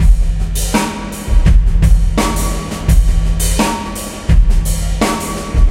Rock beat loop 13 - loop ride pattern - Remix Lowbass 2
Massive low bass in kick, ride pattern, lots of reverb.
trippy, loop, bass, drum